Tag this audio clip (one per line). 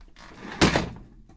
car
crash
hit